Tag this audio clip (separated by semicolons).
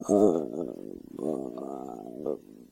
foley; human; tummy; tummy-grumble